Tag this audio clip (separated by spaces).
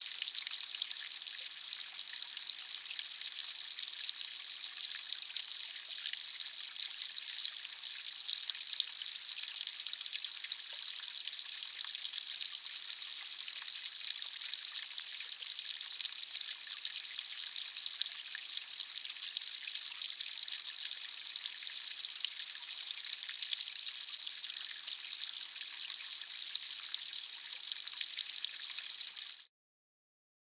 field-recording nature pond raw water